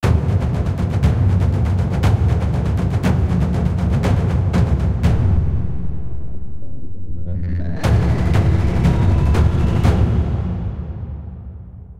trailer build
A 12 sec trailer tension builder using plugins "Serum" and "Action Stikes".
I used a preset in Action Strikes and did a little bit of modifying.
With Serum I synthesized the sound from scratch using many of its parameters.
Trailer, ActionStrikes, Serum